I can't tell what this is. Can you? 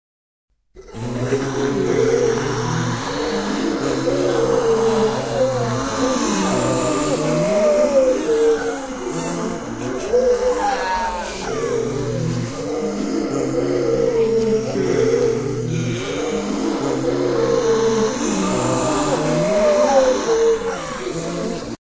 Zombie ambient (background) for a living dead film.
;D